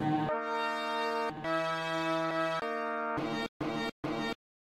classic sample sliced
classic, slice